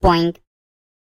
Single Vocal Bounce
Mouth-made "boink" sounds. Layered some of these to make a jump sfx for Super Sun Showdown.
Recorded with Zoom H2. Edited with Audacity.